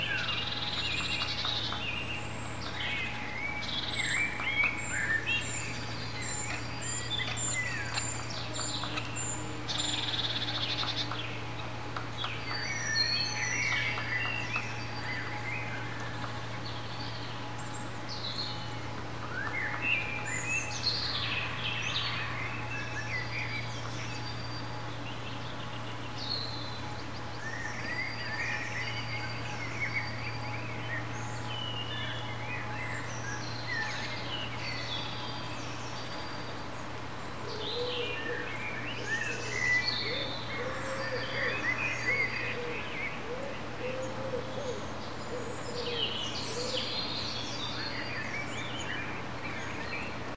Spring in German Woods
Spring-Ambience in German Woods.